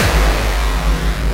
HardcoreKick Seq02 10
A distorted hardcore kick
kick, hardcore, distorted